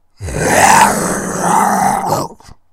a animal noise I recorded using my mouth